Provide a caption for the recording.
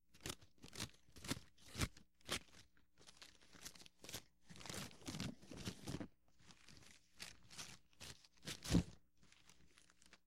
paper03-cutting paper#2
Large sheets of packing paper being cut with a pair of scissors. Could also apply to wrapping a present.
All samples in this set were recorded on a hollow, injection-molded, plastic table, which periodically adds a hollow thump if anything is dropped. Noise reduction applied to remove systemic hum, which leaves some artifacts if amplified greatly. Some samples are normalized to -0.5 dB, while others are not.
christmas, cut, cutout, cutting, newspaper, packing, paper, scissors, wrapping